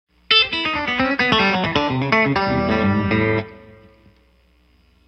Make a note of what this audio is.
Tremolo and twang guitar #4
A twangy country guitar riff in A
country, guitar, twang